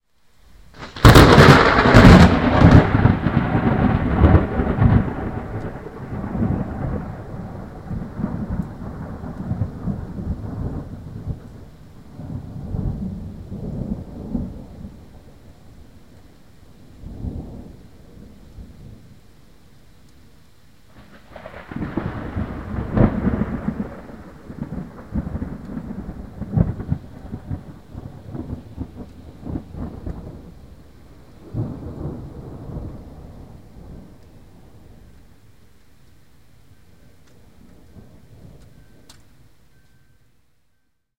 Two very close lightning strikes recorded by MP3 player in a severe thunderstorm. 10th of June, 2009, Pécel, Hungary.